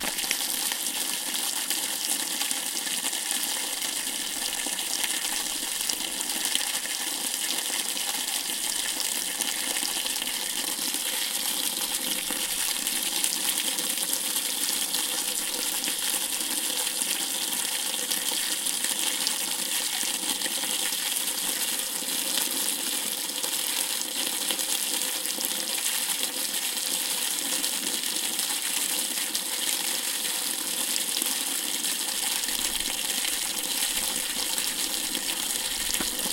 Water pouring from a source in the woods, and ends up in a pipe over a bridge.